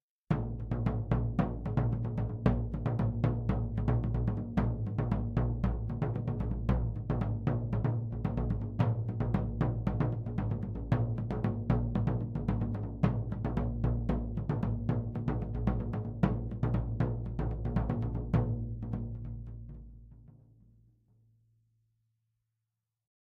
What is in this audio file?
Davul Left Percussion Bass Drum
Just a little recording of my davul from david roman drums
percussion, loop, beat, davul, turkish, bass, drum, rhythm